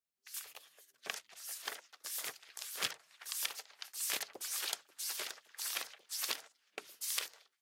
Flipping through the pages of a textbook